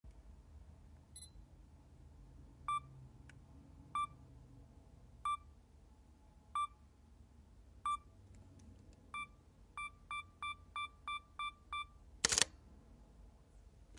Sony Camera 10 sec self timer and shutter
Recording of my Sony A7ii self timer set to 10 secs featruing the shutter snap at end. Recorded on the Tascam MK 100 - II.
alpha, shutter, a7iii, camera, sony